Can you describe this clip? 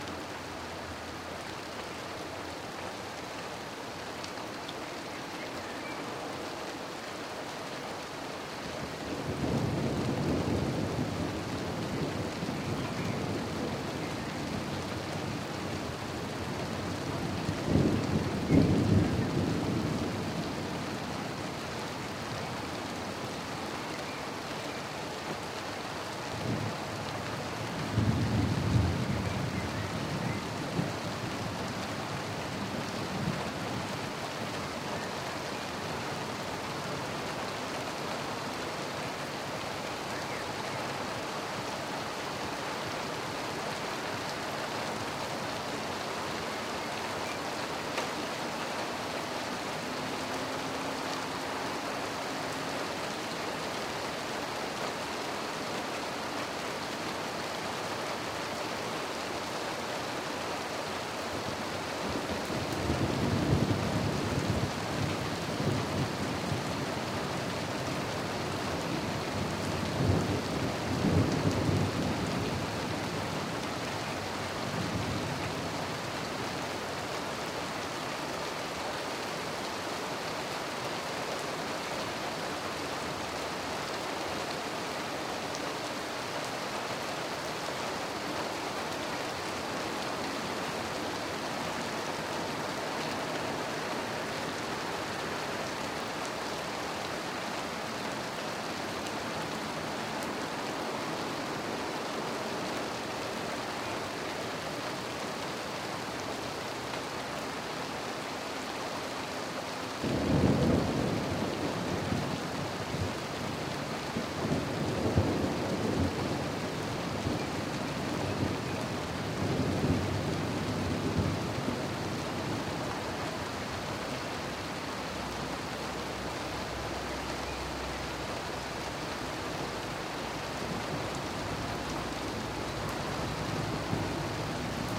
Lightning and Rain in the city
Lightning and Raindrops. In the far background driving cars.
Thunder, Field, gewitter, Thunderstorm, Bus, Highway, Motorway, Traffic, Ambience, Cars, Loud, Ambiance, Road, Regen, Free, Street, Weather, Storm, Country, Movie, Sound, Recording, Film, Drive